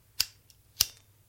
Lighter being flicked on